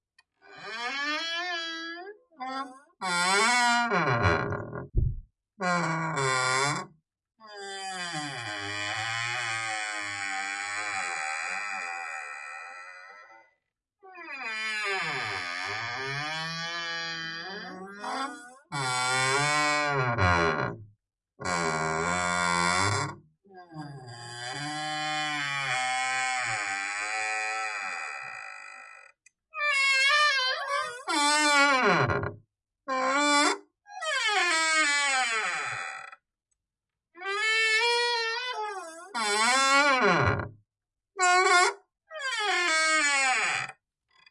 The mild wind was pushing the door in and out
creaking, door, field, recording